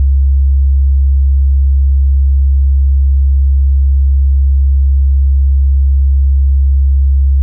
om4frequ
-68,05 hz- -sinus-
You sound amazing.
05, 68, frequency, hz, om, sinus